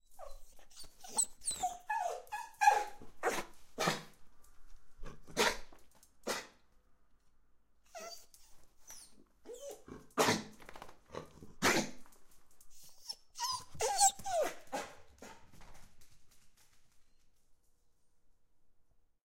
dog max whine howl bark strange guttural sounds
my dog in the hallway, recorded while I'm away. He's got separation anxiety, so sadly enough he vocalises his emotions. This recording is part of the process of understanding him and finally hopefully help him dealing with being alone sometimes.
recorded with a tascam DR100
dog, sounds, guttural, animal, bark